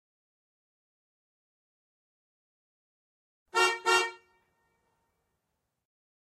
Suzuki car horn, external.
automobile, car, auto, horn